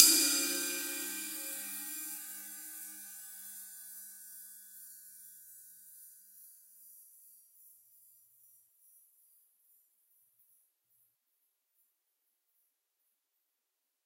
Zildjian Transitional Stamp Sizzle Ride Cymbal Hit
Sampled off of a rare transitional stamp Zildjian sizzle cymbal with 6 rivets.